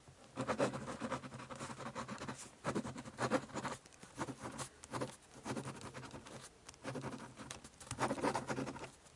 Writing with a ballpoint.
Ballpoint, Panska, Office, Writing